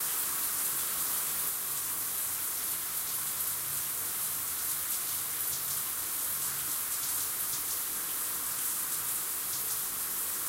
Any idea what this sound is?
A loop of rain created by layering a shower over itself multiple times.
ambience, layered, loop, Rain, shower